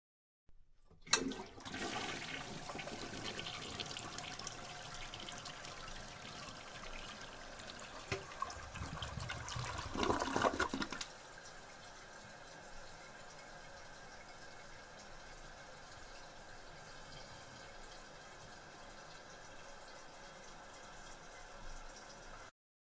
toilet flush
flush, toilet